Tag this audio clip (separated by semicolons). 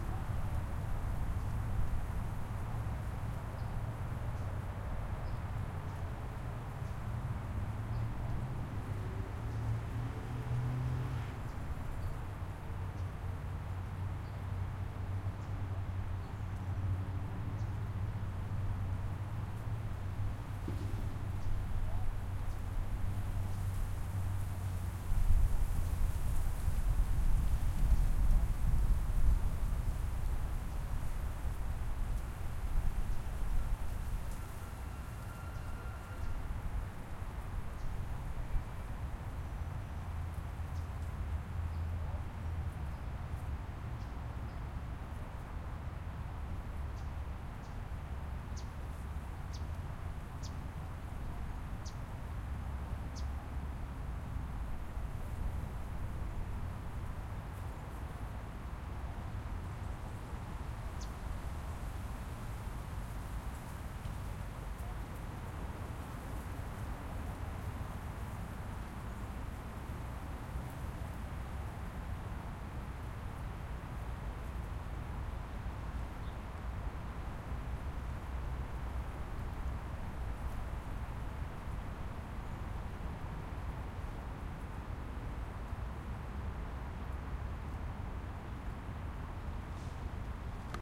trees; birds; river; breeze; los-angeles; chirping; wind; freeway; road